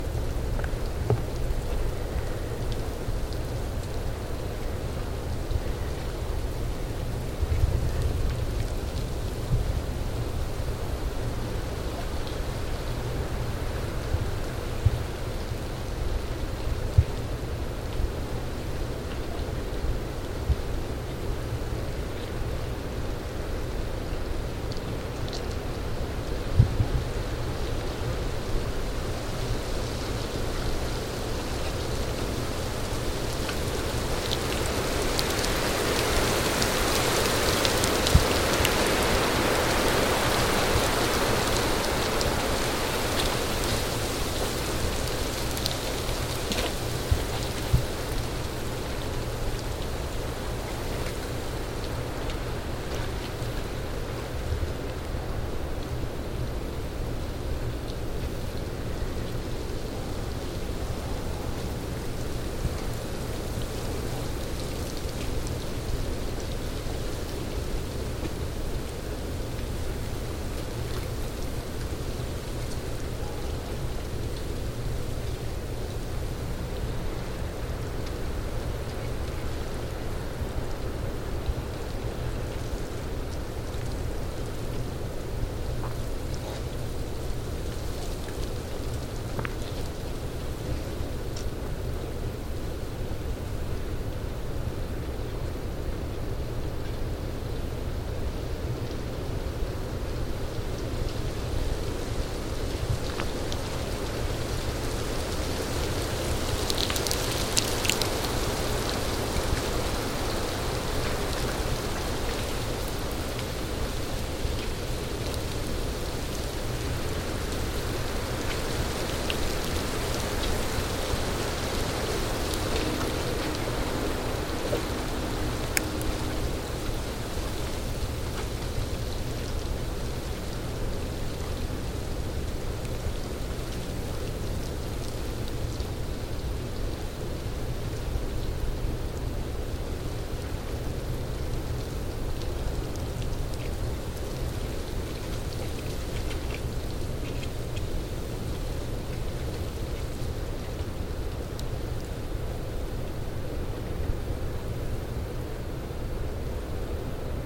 Wind in Pines with Snow and ice Falling from Trees Figuried
breeze, ice, pine, pines, snow, Trees, wind, windy